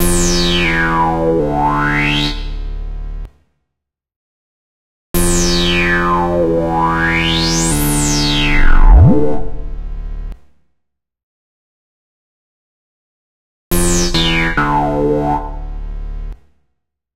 Game over sounds for video games made in LMMS.
Plaintext:
HTML:
over, jingle